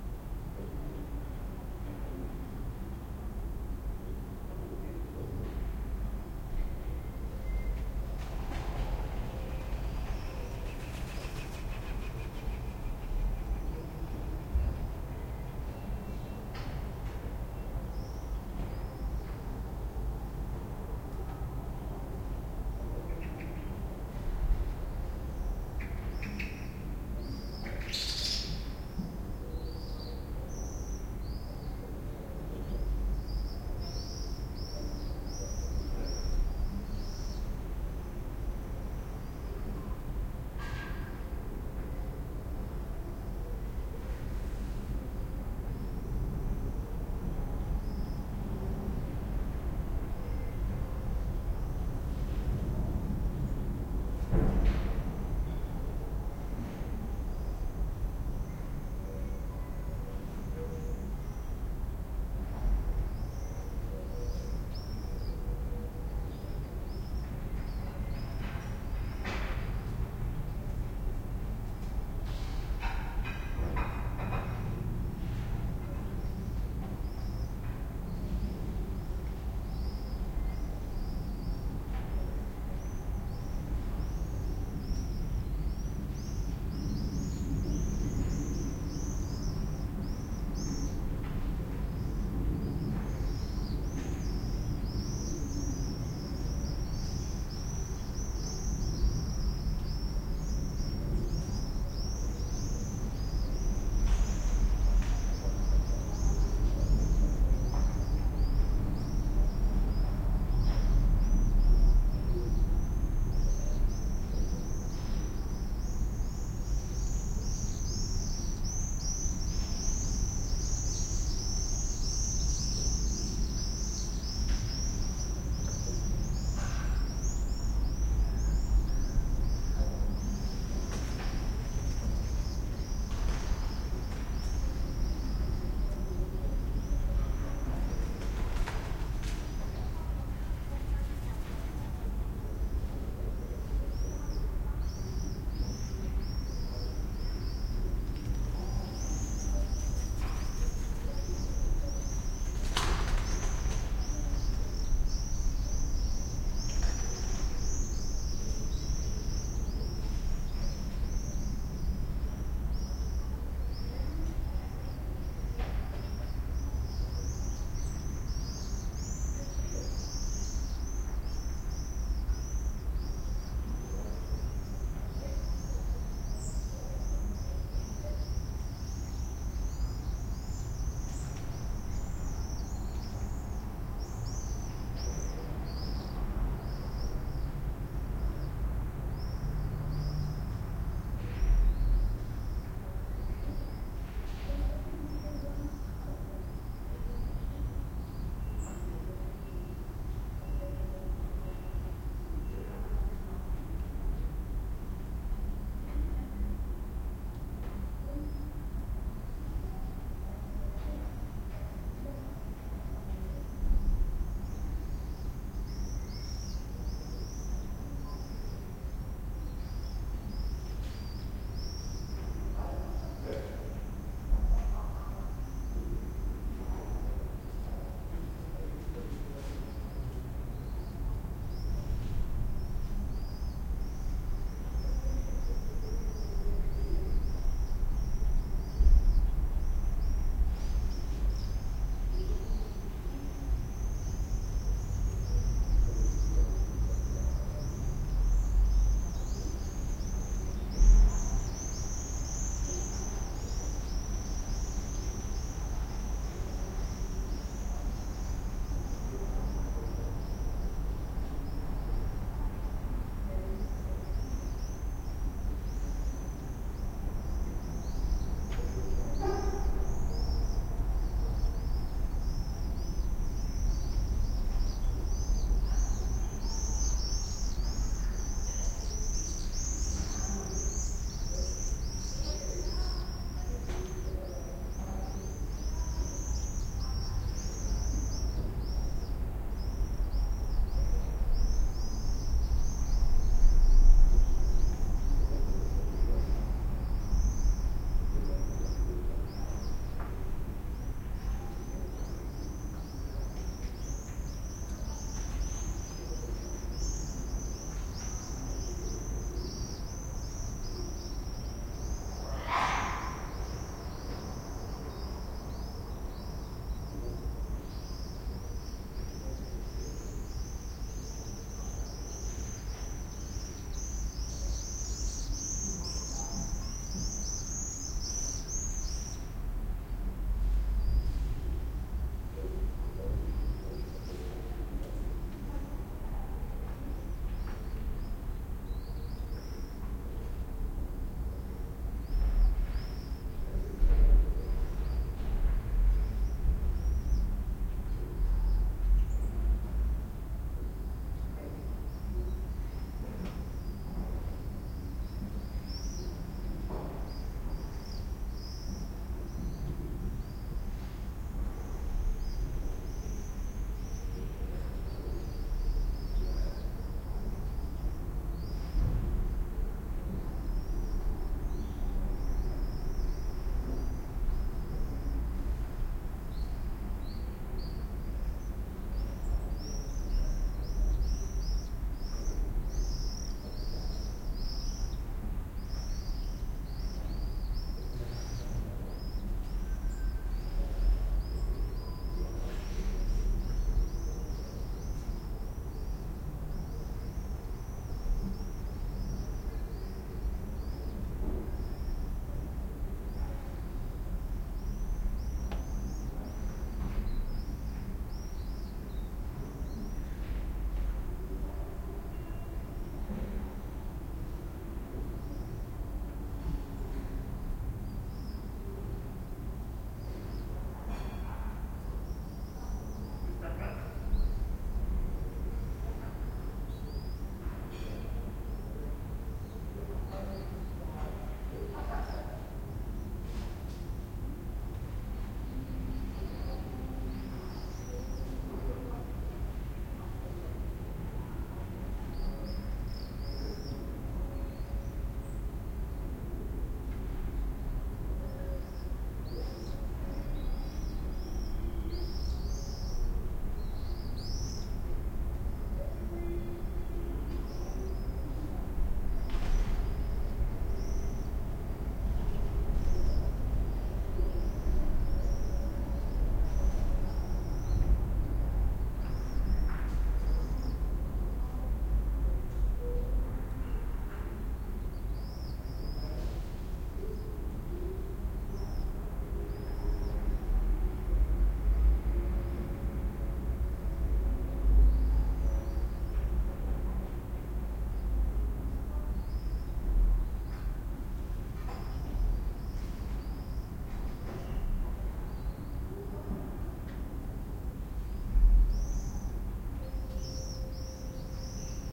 summer evening in town
For all of you, who live in the northern hemisphere: here is something of things to come. Mind you, the recording sounds pretty low-fi...but I love those swifts.